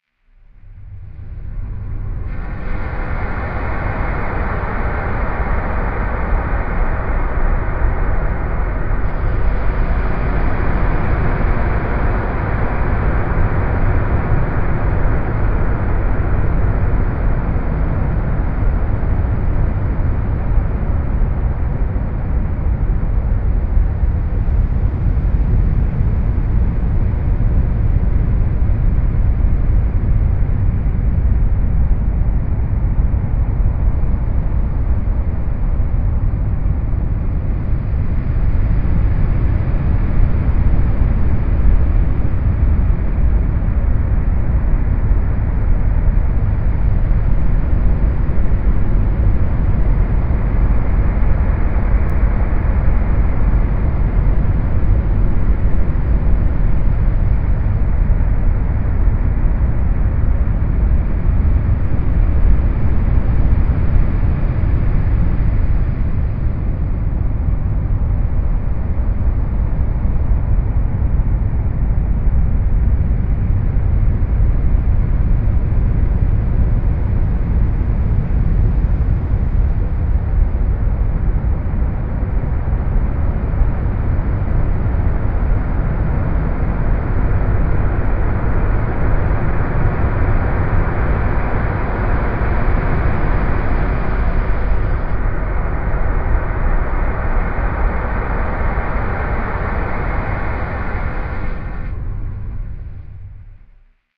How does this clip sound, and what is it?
An ambient sound, best experienced in
a quiet environment, as the sound is
best enjoyed at a moderate volume level.
Completely manufactured or created with
Audacity with a basic noise file.
There are very slight nuances or
discrepancies with the sound; it it not
a perfect monotone track, given the
initial sample is not a tone but a white
noise sample.